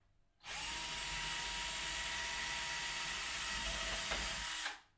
The sound of a drill drilling wood
DIY, Drill, loud